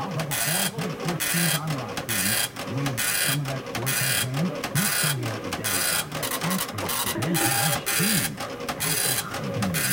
cash register printout
A cash register prints out receipts for the day. There's a bit of low background voices. Recorded with Sennheiser 416 to Fostex PD-6.
business-ambience, cash-register, continuous, field-recording, machine, mono, printout